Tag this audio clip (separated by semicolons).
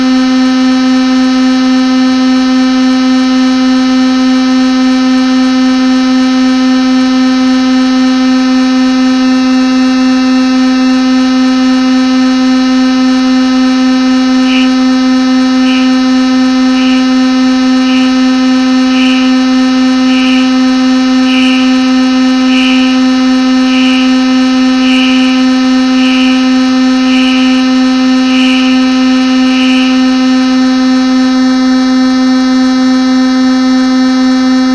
building-loops; fragments; music-bits